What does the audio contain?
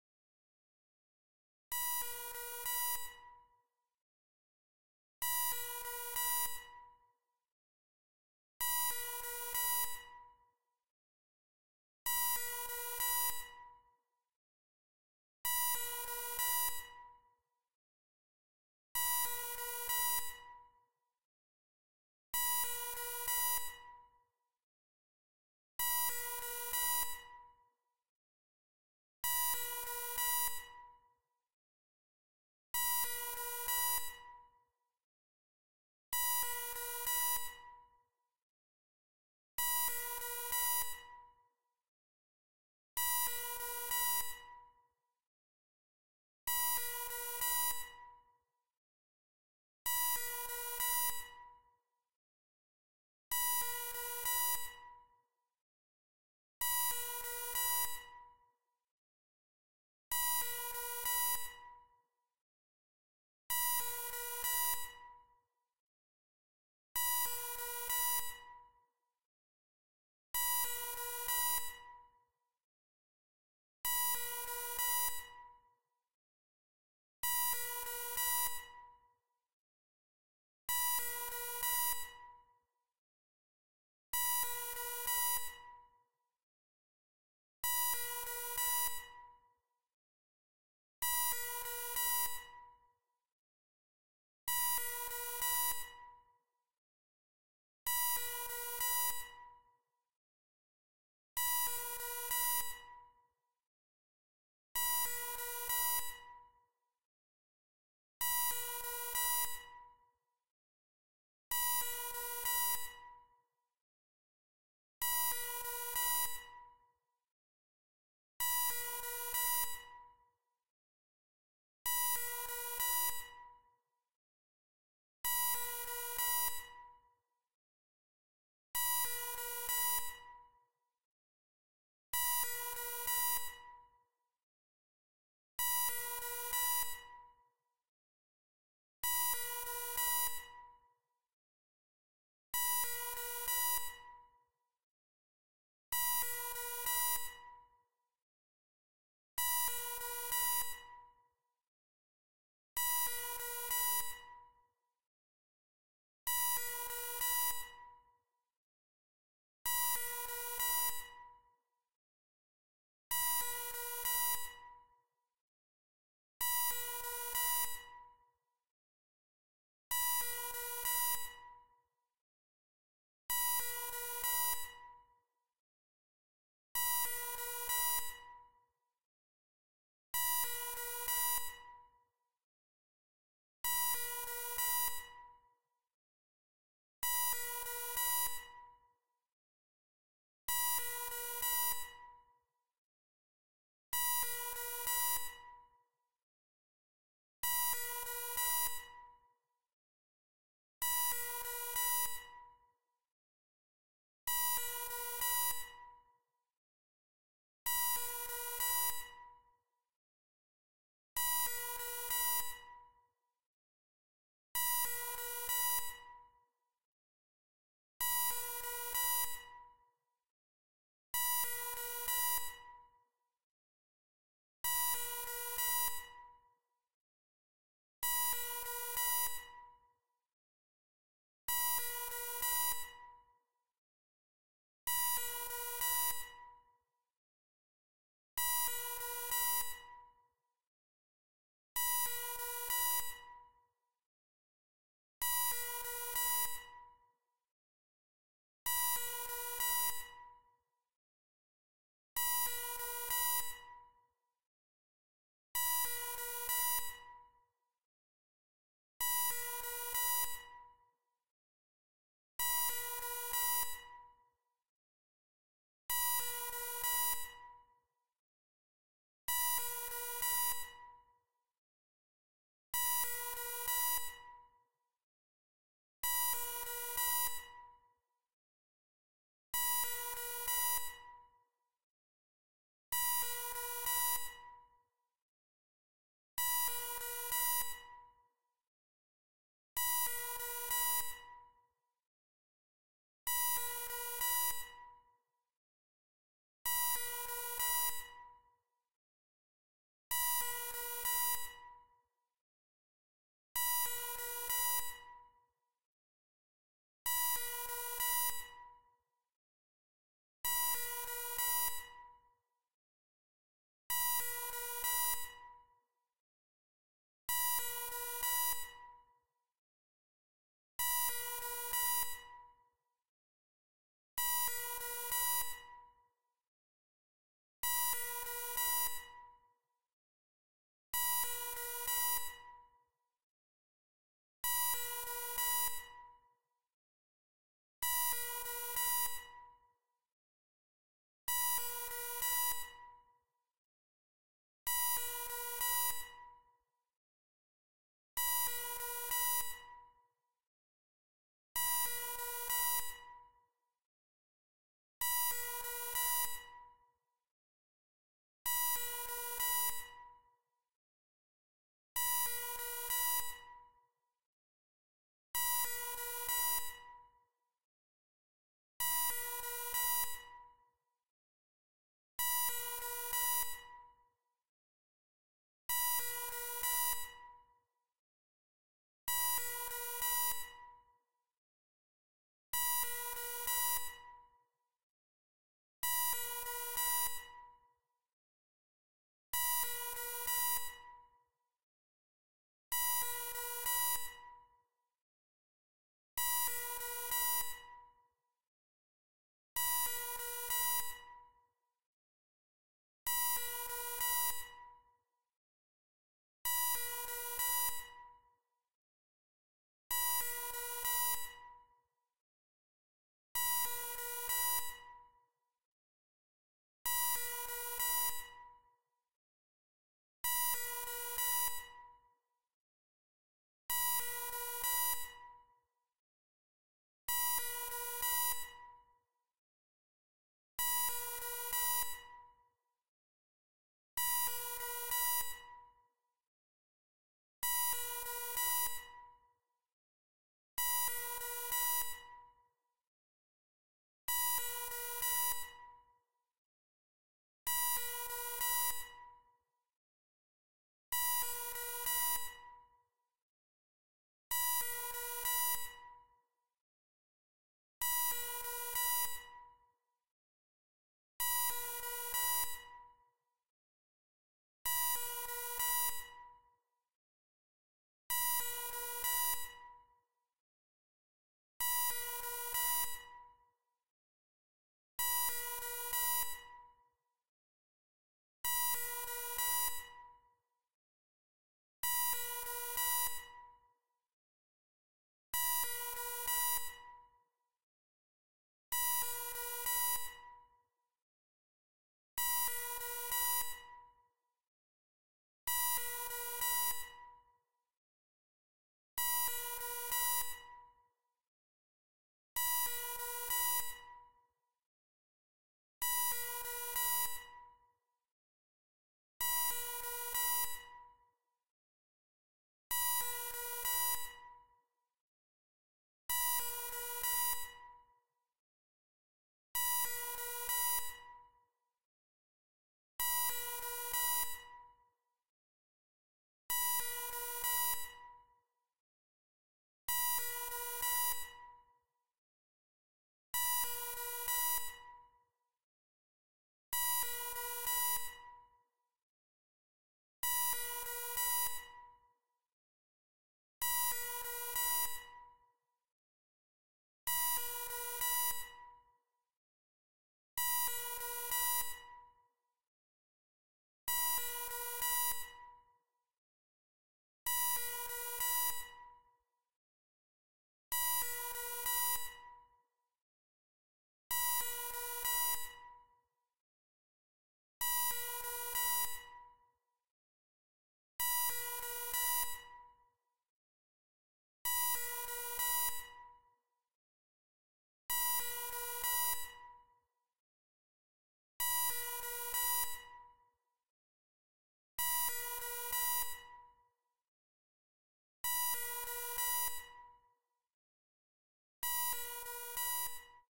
Heart Monitor Beep Loop
Heart Rate Monitor Beep Loop. I created this 10-minute sound based on a hospital Heart Rate Monitor machine, for people who find this sound relaxing. In my studio, using a KORG X-50 Synthesiser I have matched the frequency of the tones and the timing and texture of the sound as closely as I could to a real heart rate monitor machine. Use headphones and immerse into a tranquil state of consciousness as your brain entrains with this sound. Helps to lower heart rate and blood pressure, promotes a relaxed state.
Beat, Calming, Relaxing